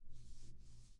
This audio represents whe somebody suit a object on any position.